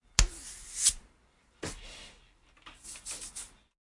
Face Touching
Some different face touches with hands
Hands, Face, Animation